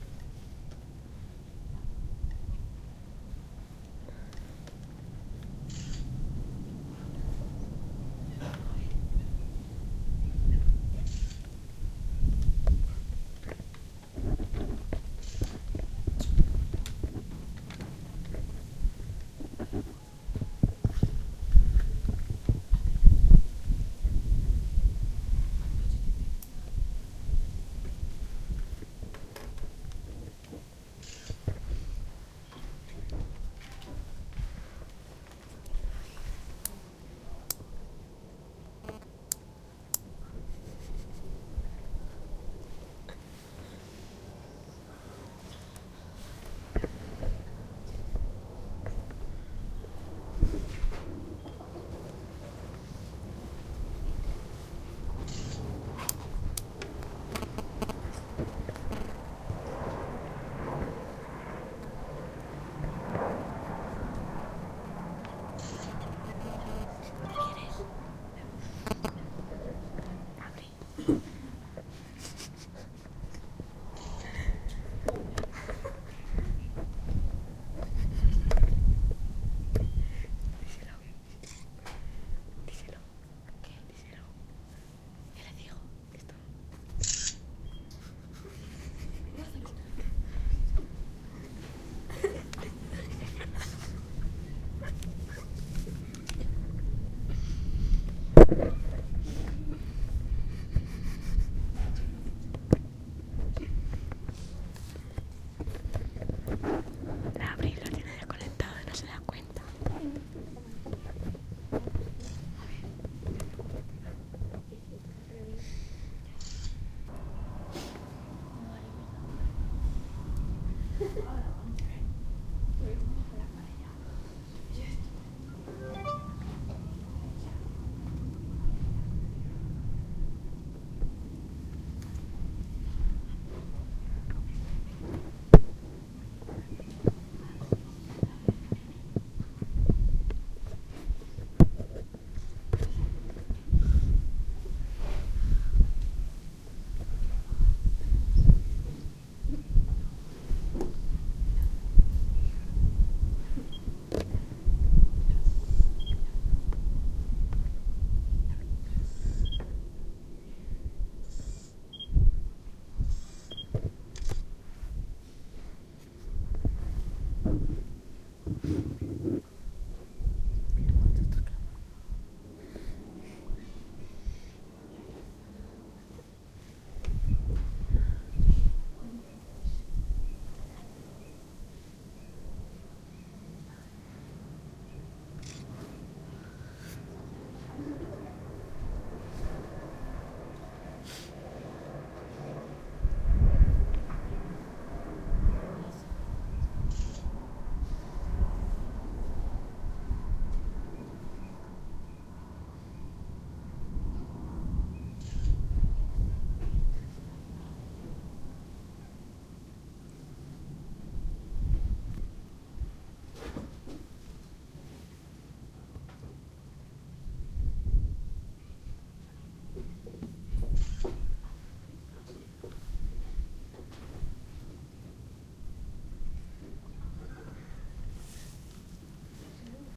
pato pato pato pato pato pato pato cuak!!
The sound of "ducks". Delta of Llobregat. Recorded with a Zoom H1 recorder.
Deltasona, ducks, especies, Llobregat, patos, sonidos, sounds